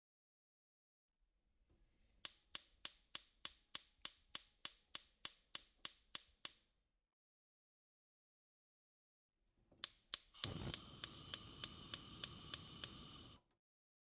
15 - Gas switch
CZ, Czech, Pansk, Panska